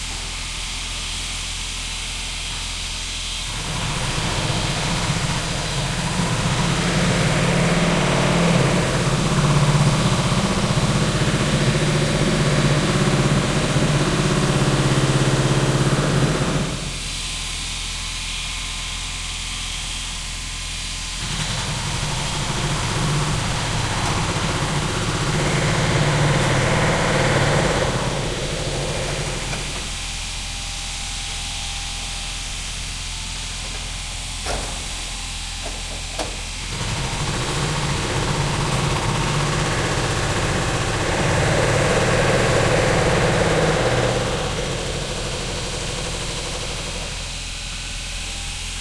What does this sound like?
Heavy Demolition/Construction
A construction site - heavy tools, hammering and noise.
Recorded with a Marantz and two DPA 4061s spaced approx. one metre.
construction-site, construction, drilling, demolish, jack-hammer, workers, concrete, demolition, tools